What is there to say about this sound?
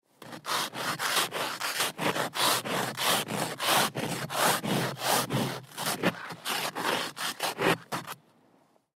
Icy car
Removing ice from a car. Recorded with a Zoom H1.
ice, snow, field-recording, car, frost, winter